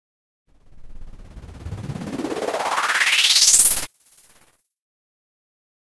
Percusive Noise Riser
Gated noise filter sweep FX with a delay slap. Good for phrasing and transitions.
[BPM: ]
[Key: Noise]